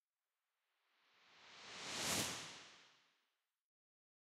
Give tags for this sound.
set pack drum kit percs perc snare

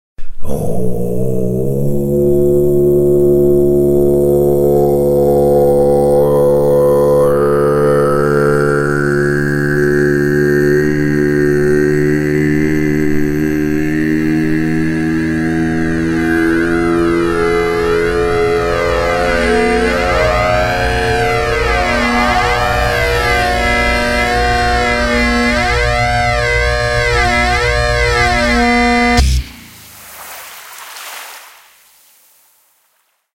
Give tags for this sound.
electroacoustic om tibet

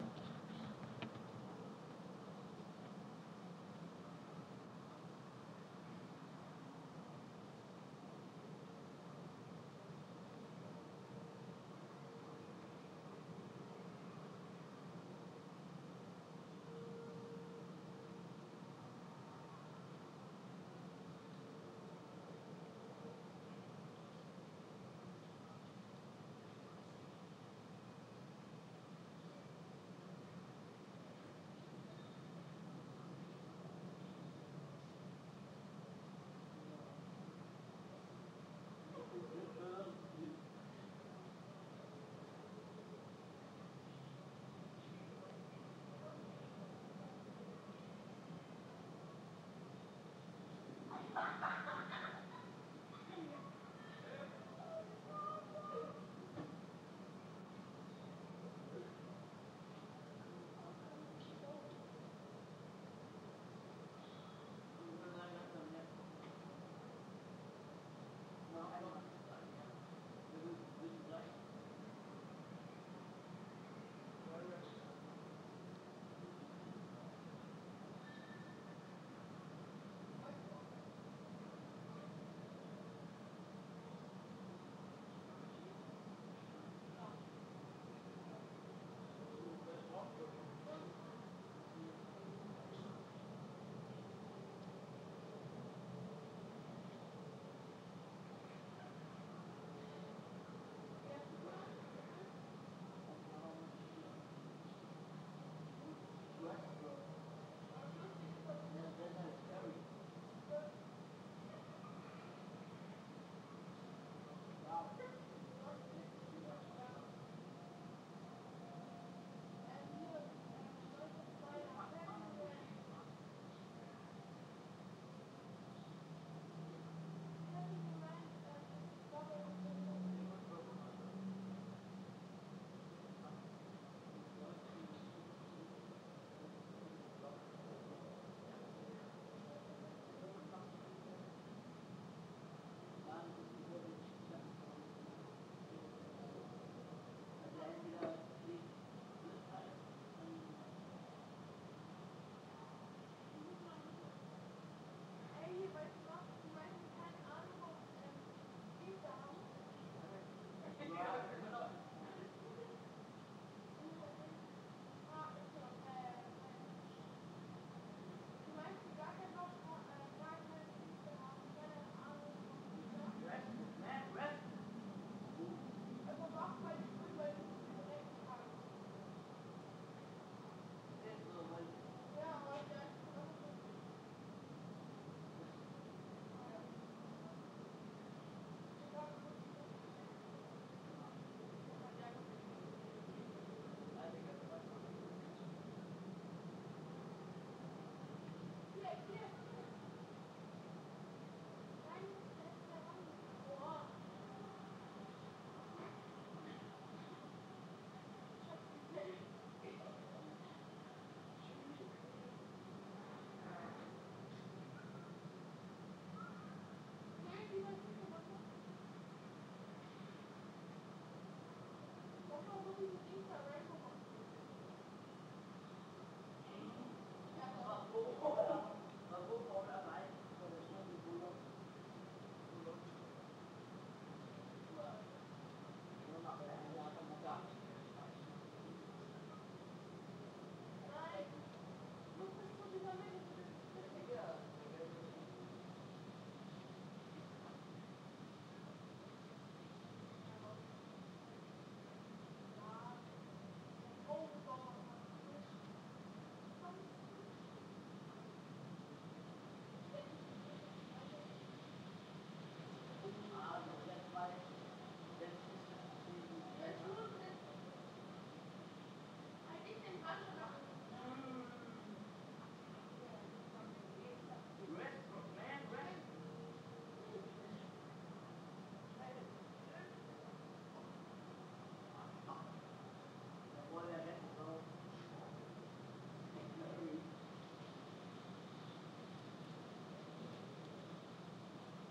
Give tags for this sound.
air soundscape hood urban neighborhood voices field-recording atmosphere Berlin ambience suburbian neighbors city bird Kreuzberg backyard Atmo residential

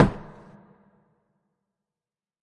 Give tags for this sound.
kick layer oneshot

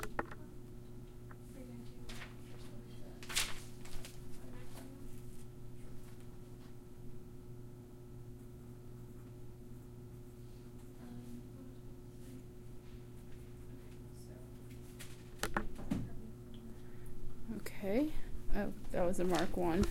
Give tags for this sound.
talking quiet machine small-room open-door computer windows

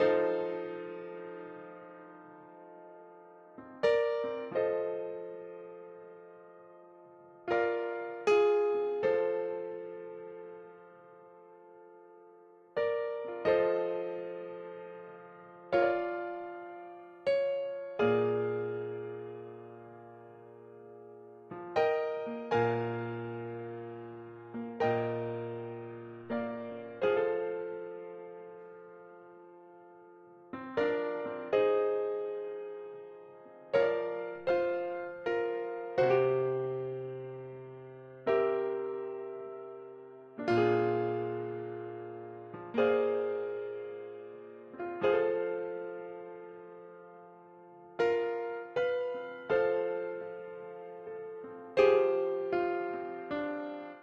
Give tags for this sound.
loop blues bpm Fa 80 Chord Piano rythm HearHear beat

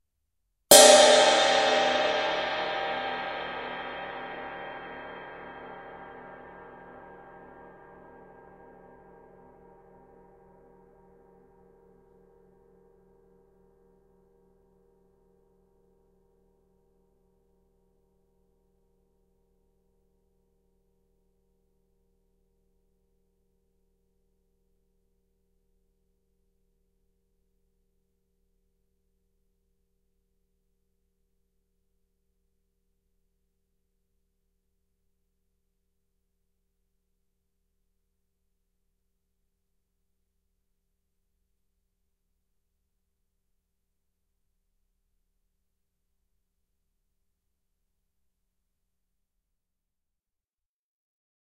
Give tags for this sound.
bronze ching cymbal stereo xy zildjian

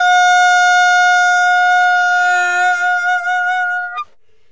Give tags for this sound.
alto-sax jazz sampled-instruments sax saxophone vst woodwind

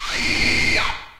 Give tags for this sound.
machinery mechanical machine loop industrial factory noise robotic robot

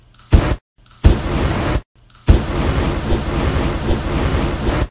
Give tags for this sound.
gun fire weapon flamethrower sfx

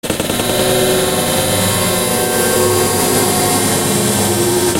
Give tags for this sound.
distortion electronic percussive

